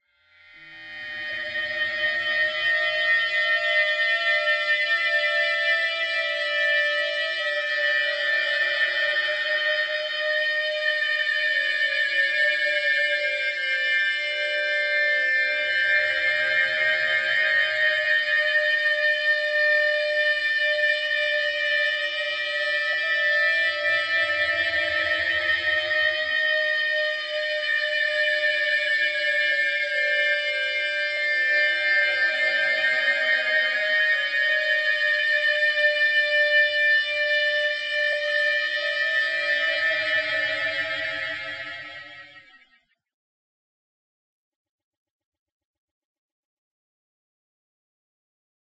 Long Very Annoying Siren or Alarm
Synthesized sound - background siren or alarm, might be in the background of alien soundscape.
accident, alarm, alien, annoying, creepy, eerie, horror, incident, long, scary, siren, synthesized